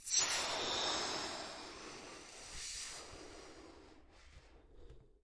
Percussive sounds made with a balloon.
acoustic, balloon, percussion, rubber